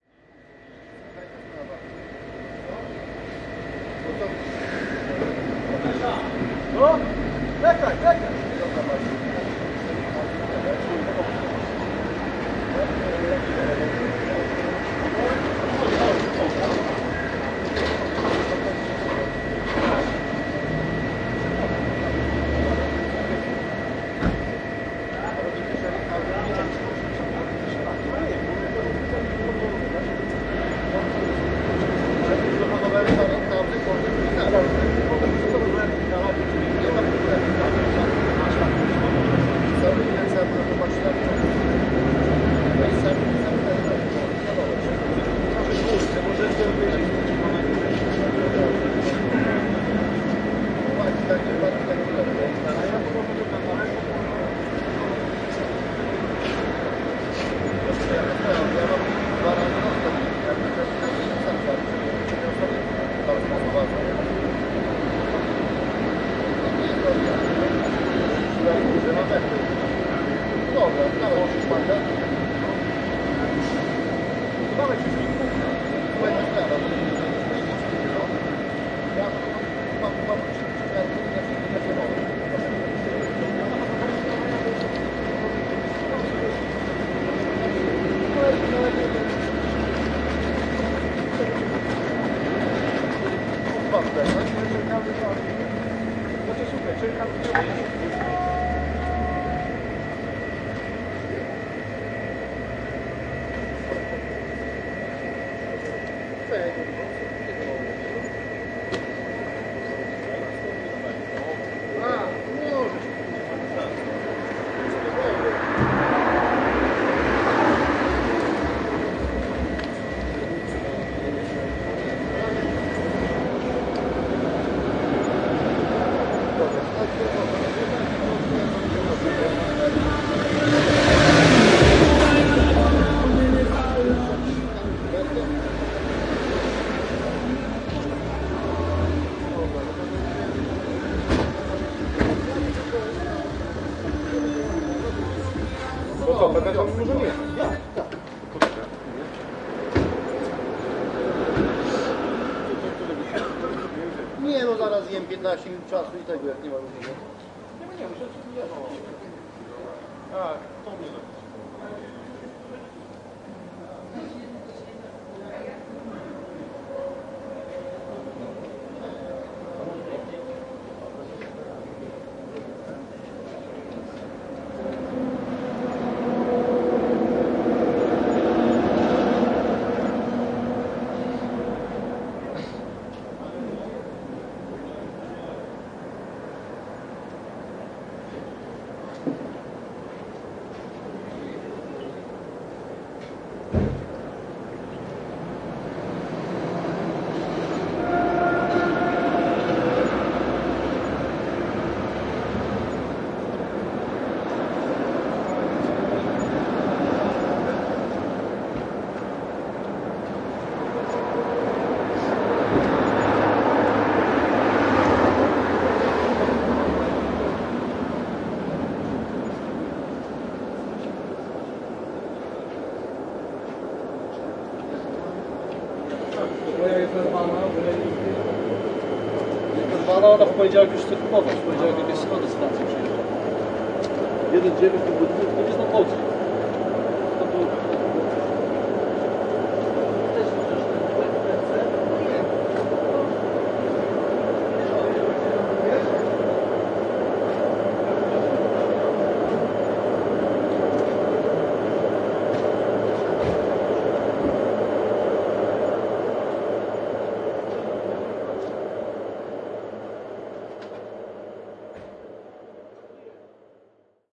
15.09.2015 crossroads 92 and 138 evening ambience
15.09.2015: around 20.00. The national road no. 92 and the vovoidship road no. 138 in Torzym (Poland). Typical ambience of this site.
cars, field-recording, noise, Poland, road, street, Torzym, traff, traffic, truck